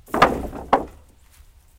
Upsetting a large pile of two-by-fours. The result being this wonderful sound.
planks, bang, fall, wood, clatter